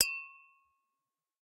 Common tumbler-style drinking glasses being tapped together. Warble in resonance after hit. Close miked with Rode NT-5s in X-Y configuration. Trimmed, DC removed, and normalized to -6 dB.